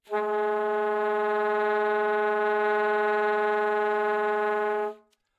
One of several multiphonic sounds from the alto sax of Howie Smith.